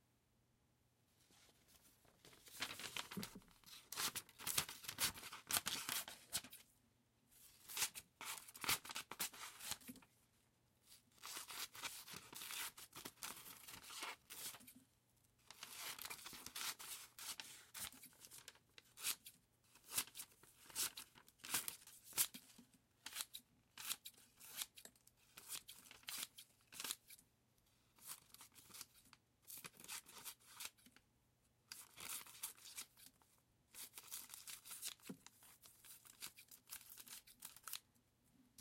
Scissors cutting paper 1
Scissors cutting a thin sheet of paper at various speeds.
cutting, paper, scissors